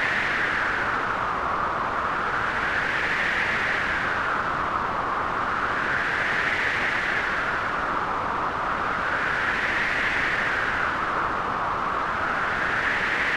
Clean wind loop without birds or trees or anything.
Generated in Audacity with white noise, amplifier, wahwah.
clean, wind, breeze, generated, gust, air